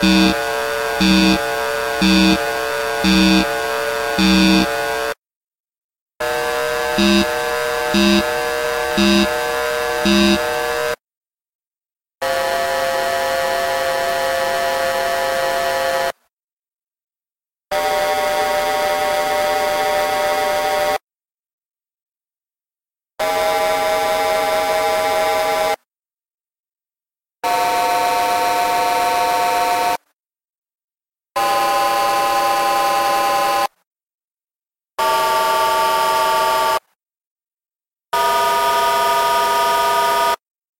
Subosc+Saro 025
Wonderful unstable sounds!
The first two pulsate on their own. Like some kind of alarm on an alien factory.
The other sounds on this clip are more mundane beeps. All were produced with the same settings, simply playing different notes up the ribbon keyboard on the monotron.
The headphones output from the monotron was fed into the mic input on my laptop soundcard. The sound was frequency split with the lower frequencies triggering a Tracker (free VST effect from mda @ smartelectronix, tuned as a suboscillator).
I think for this one also the higher frequencies were fed to Saro (a free VST amp sim by antti @ smartelectronix).
overdrive, monotron-duo, mda, electronic, bleep, unstable, saro, tracker, smartelectronix, beep, antti, korg, pulsating, distortion